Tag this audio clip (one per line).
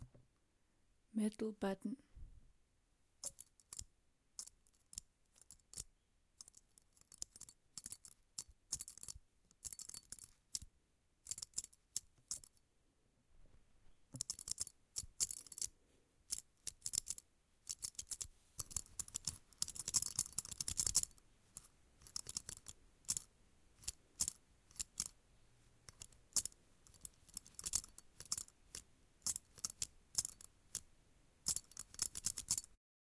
Metal-button
OWI